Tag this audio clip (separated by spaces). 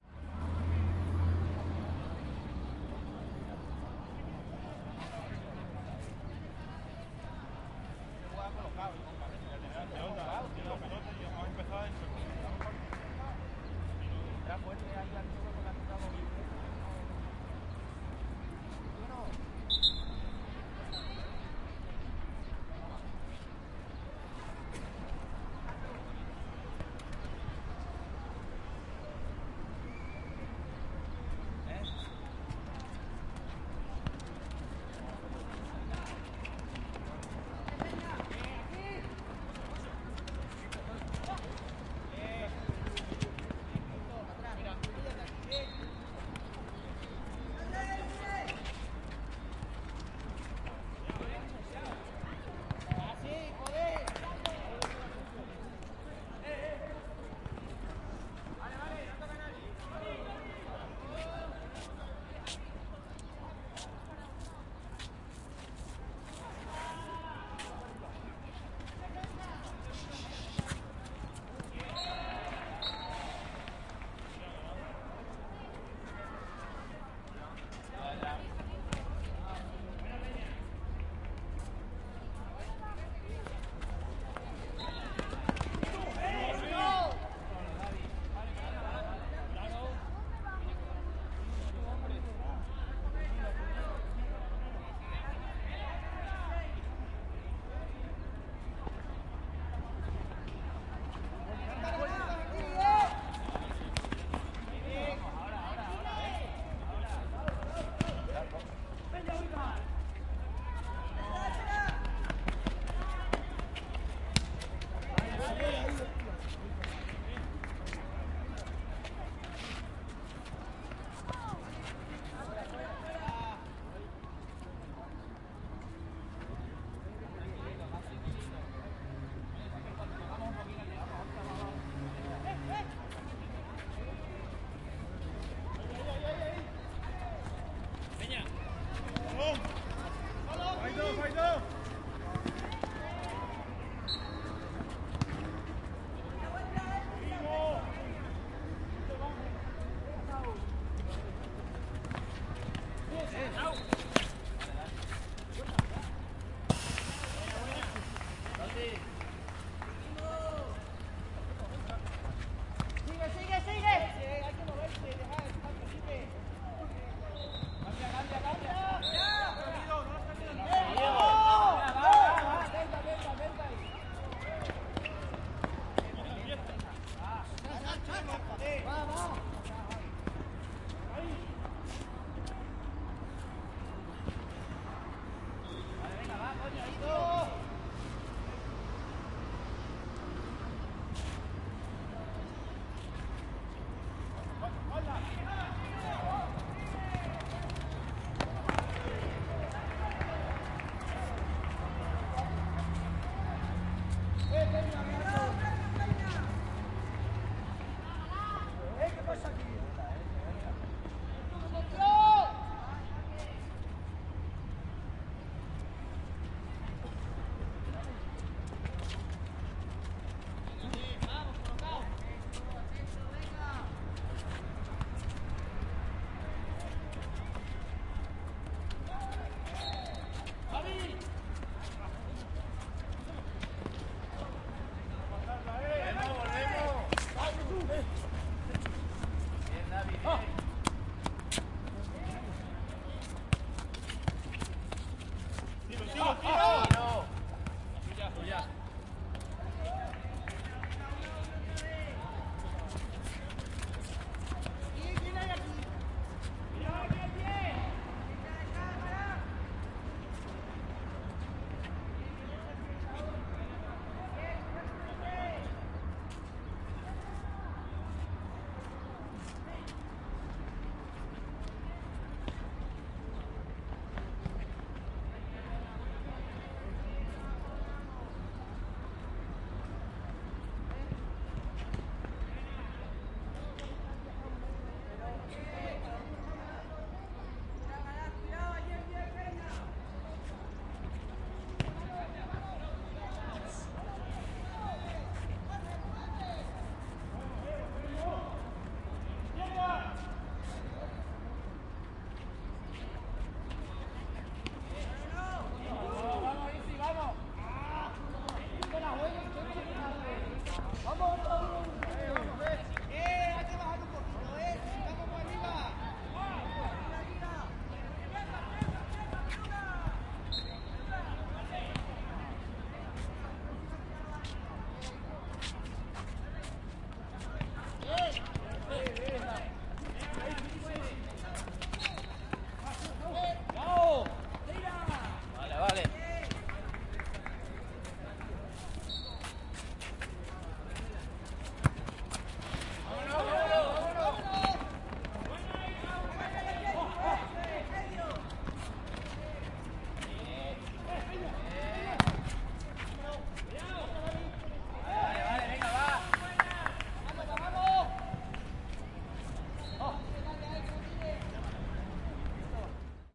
whistle spanish voice field-recording football traffic spain caceres